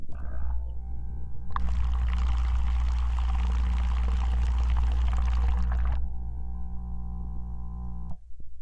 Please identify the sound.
Gurgling milk recorded with a contact mic into a Zoom H4N.